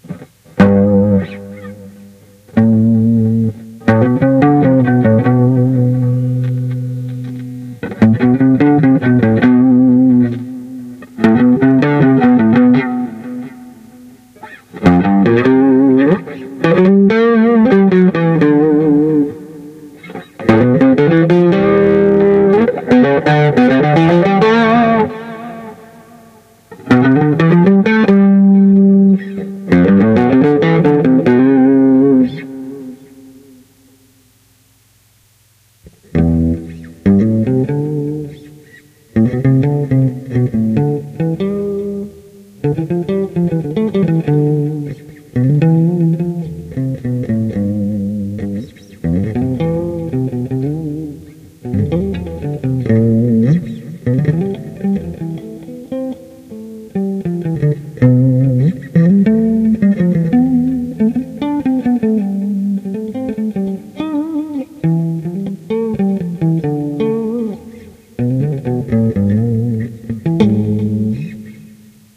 doodling nonusb
Testing the DS-40 in various USB class settings to determine if the unit can work as a cheap USB interface. Various settings of mic sensitivity and USB setting. This is a comparison of using the unit to record direct from guitar processor output.